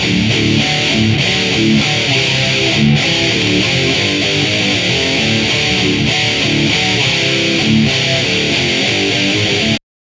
REV LOOPS METAL GUITAR 11
rythum guitar loops heave groove loops